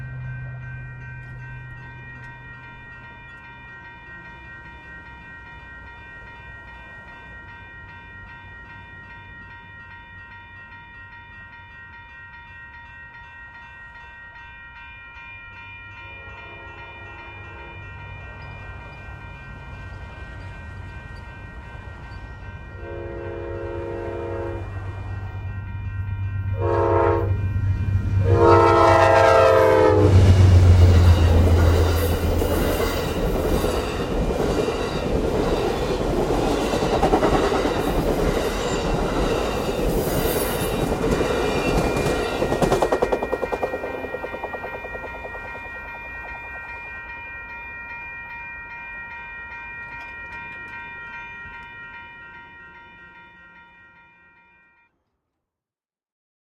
A short passenger train recorded as it was passing a train crossing.
locomotive, passenger-train, rail-road, railroad, rail-way, railway, train